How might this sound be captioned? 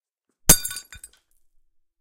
A small glass or a piece of glass breaking on the floor.
break, breaking, cup, glass, shatter, smash